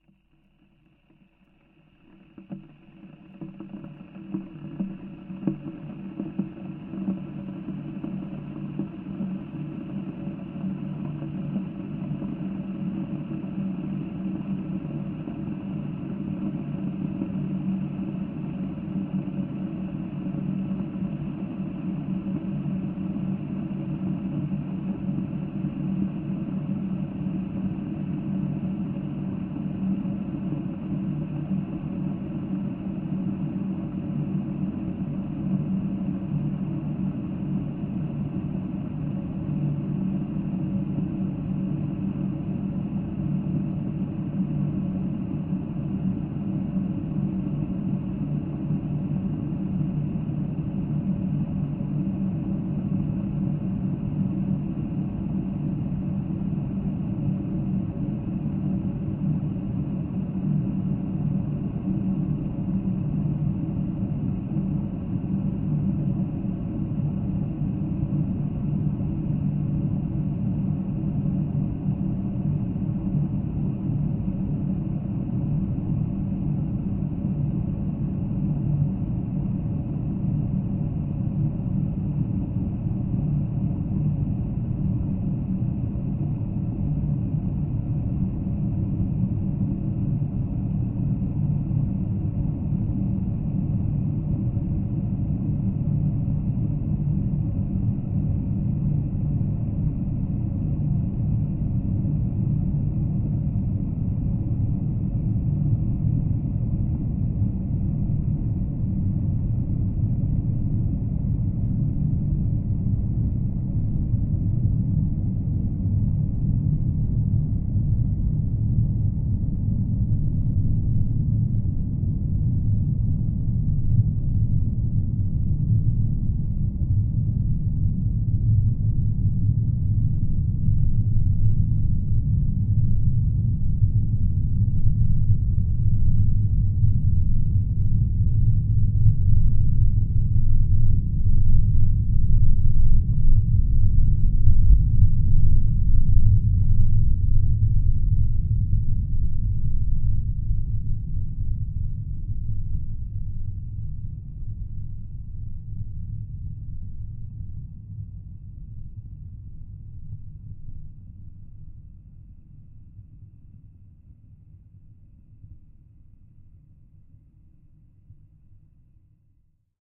Kettle Boiling Contact Mic Recording 1 (Geofon)
Recording of a kettle boiling. Recorded with a LOM Geofon contact microphone into a Sony PCM-A10.
kitchen, ambience